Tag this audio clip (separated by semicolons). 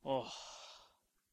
foley; sigh; vocal